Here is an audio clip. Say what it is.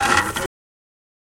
Metsal Rubbin'
Metal is dead labor made permanent. Its resonance is the result of many workers' toil and intelligence struggling over a product that will belong to someone else. The urban environs sounds out this secret fact of social life; the real trick is learning how to hear it.
Recorded with a Tascam Dr100mkii.
drum-kits, field-recordings, percussion, sample-pack